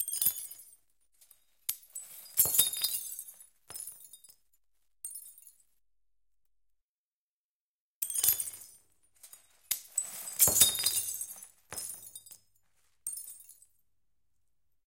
Handling Glass Shards 1
Handling tiny glass shards. Moving them. Some sound even harmonic. Beautiful.
Recorded with:
Zoom H4n on 90° XY Stereo setup
Octava MK-012 ORTF Stereo setup
The recordings are in this order.